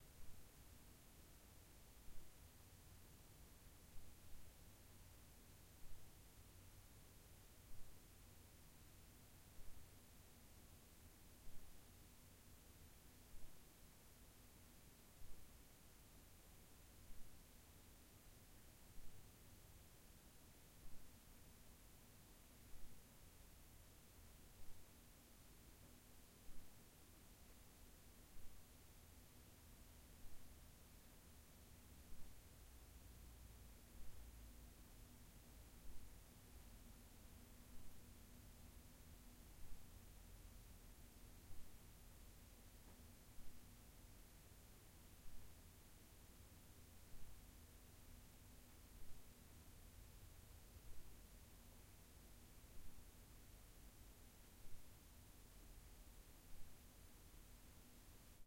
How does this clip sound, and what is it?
Bedroom tone
Room tone captured from a medium sized bedroom
ambiance, room, tone